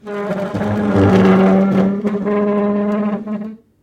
Chair-Stool-Wooden-Dragged-07
The sound of a wooden stool being dragged on a kitchen floor. It may make a good base or sweetener for a monster roar as it has almost a Chewbacca-like sound.
Ceramic, Drag, Dragged, Kitchen, Monster, Pull, Pulled, Push, Pushed, Roar, Snarl, Stool, Tile, Wood, Wooden